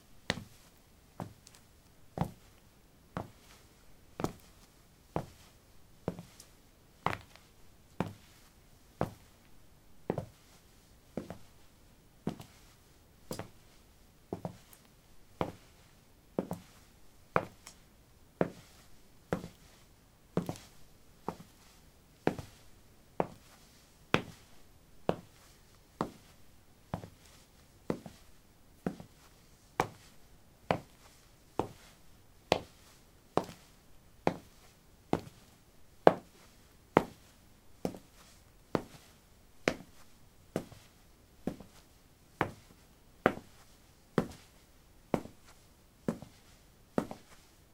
concrete 10a startassneakers walk
Walking on concrete: low sneakers. Recorded with a ZOOM H2 in a basement of a house, normalized with Audacity.
walk, walking, footstep, step, footsteps, steps, sneakers, concrete